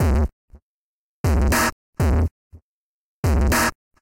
MOV. bet 2 120
computer beat Logic
120-bpm, beat